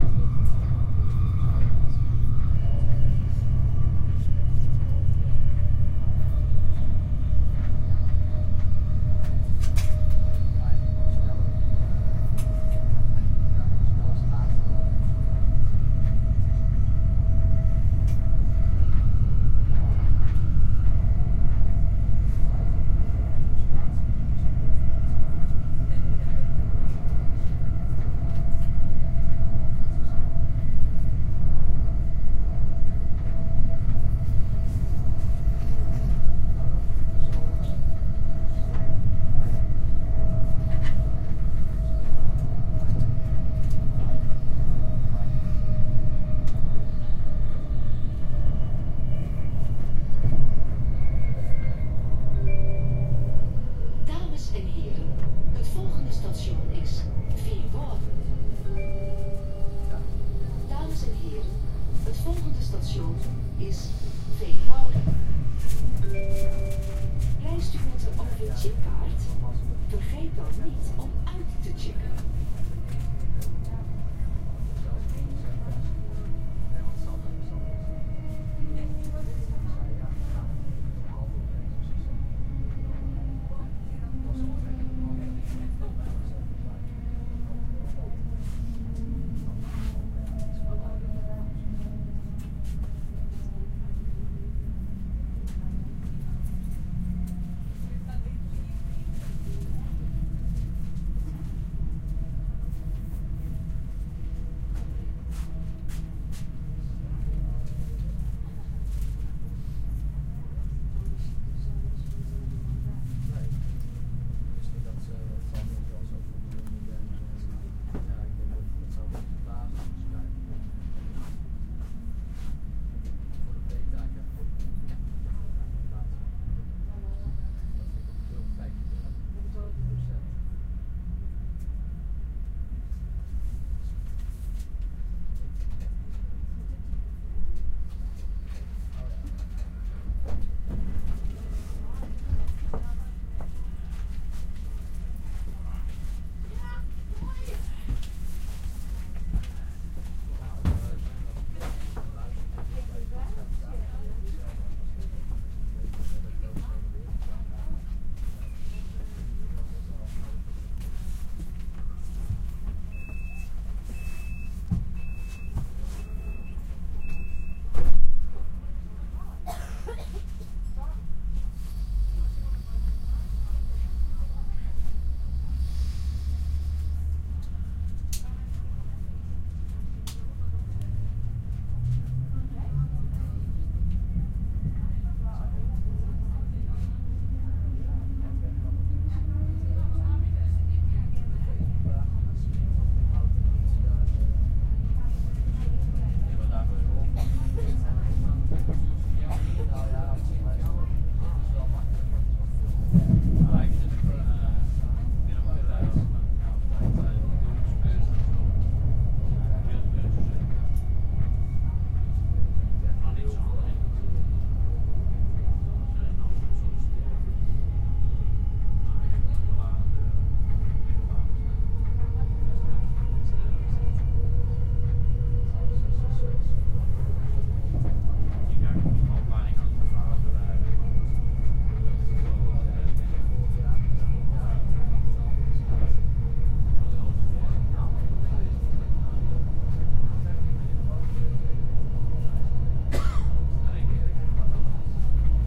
Train ambience
Train pulls up from a station and drives to the next station. On the background you hear people speak. When the train arrives at the next stop you hear a few announcements in Dutch and the train slowing down. Door opens and people walk in and out. People cough, talk loudly for a second and the train goes to the next stop.